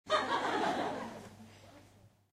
LaughLaugh in medium theatreRecorded with MD and Sony mic, above the people